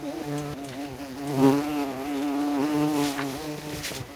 Buzzing sound of a bumblebee (Bombus terrestris). Recorded with mobile phone.
insect, wings, bee, buzzing, bumble, buzz, wasp, bumblebee, flying, nature